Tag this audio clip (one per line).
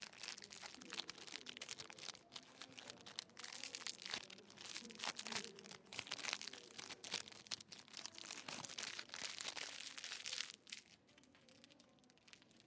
packaging
wrap
plastic